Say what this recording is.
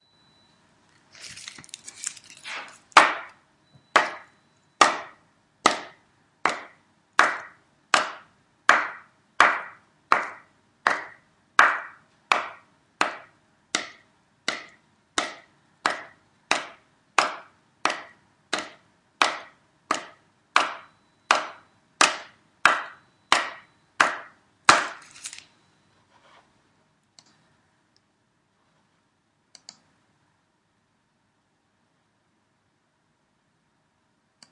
sound from two pair of logs
the sound of a beating, hammering sound.
Natural wood
putting, logs, pair, together, two